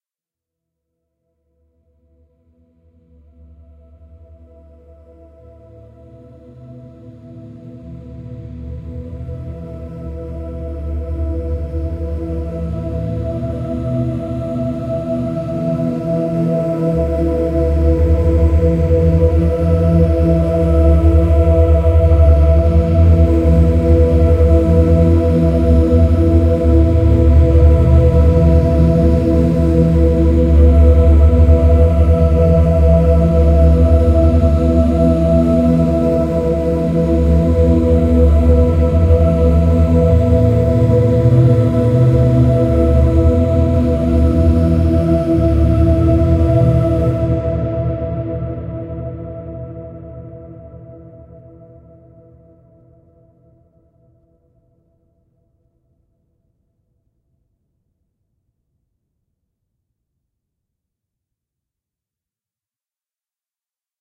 confused voices
Some ambient pad made with voices
Granular synthesis The Mangle
ambient, voices